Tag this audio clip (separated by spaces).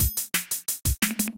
drum-and-bass loop breakbeat dnb drum jungle drums drum-loop break